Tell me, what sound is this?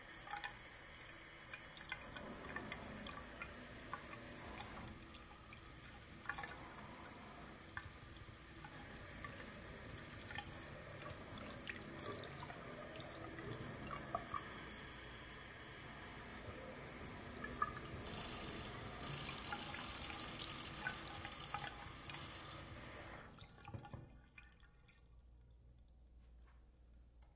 Running the faucet, take 3.